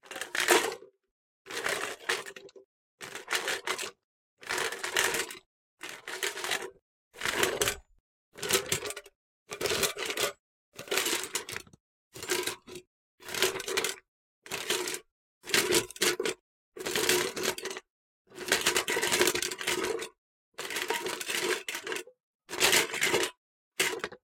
Kitchen Cutlery Tub
Wooden/Plastic/Metal utensils being shaken in a metal tub.